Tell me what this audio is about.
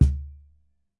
Cajon "Bass" samples in different velocities (The lager numbers ar the softer Sounds, The smaller are louder)
Recorded Stereo (An AKG 112 on the Back side, the Sure Sm7b on the Front)
To avoid phase problems, frequencies below 300 Hz are paned MONO!!)